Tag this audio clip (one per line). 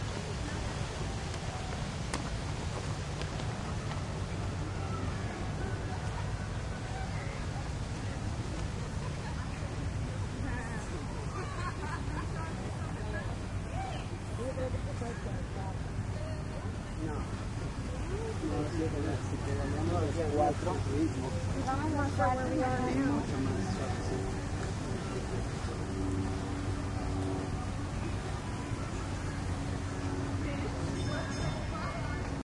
animals
field-recording
zoo